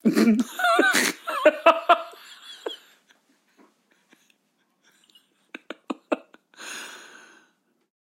laughing man 4
Young man laughing enthusiastic!